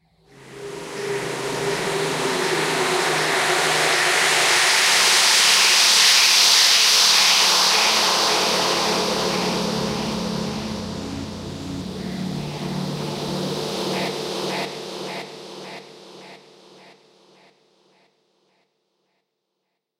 I recently contributed a track to a Triple LP set of krautrock cover versions ("Head Music 2", released by Fruits De Mer Records, December 2020). The song I chose to cover was Kraftwerk's "Autobahn".
If you know the track, you'll know that it uses synthesised traffic sounds alongside recordings of the same. On my version, I achieved these in three ways:
2) I got in my car with my Zoom recorder and made my own recordings of the engine starting, stopping, etc
3) I made my own sound effects using virtual synths and effects in Ableton Live 10
This particular sound falls into the third category.